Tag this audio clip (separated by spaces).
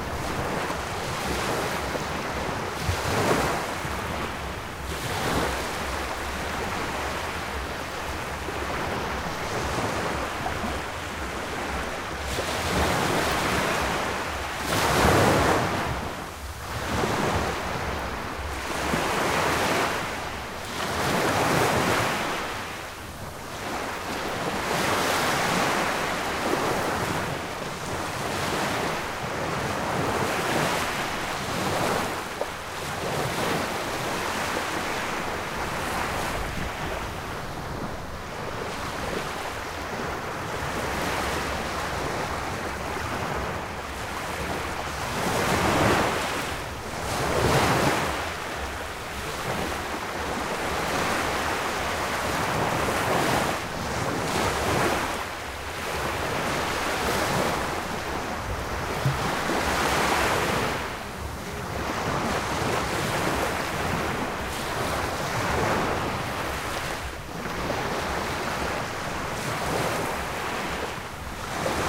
field-recording mar waves